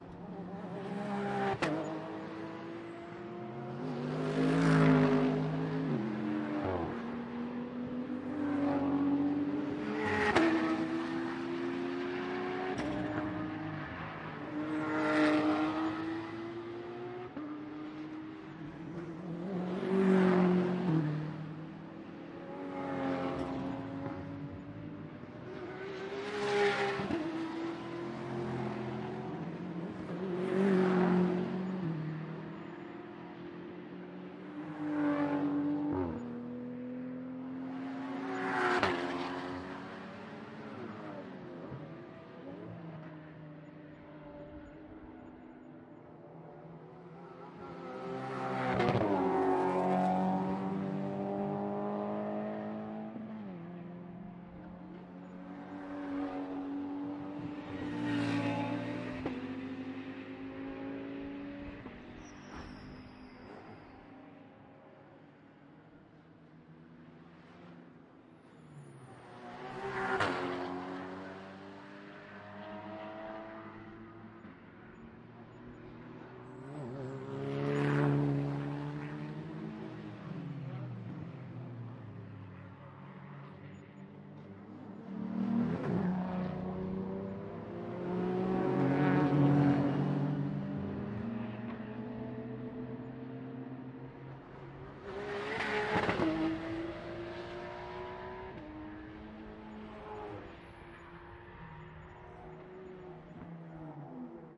Car race, Nordschleife, VLN, several cars passing by, backfire
Several race cars passing by at a VLN race at the Nordschleife, Germany
Recorded with a Zoom H1 (internal mics)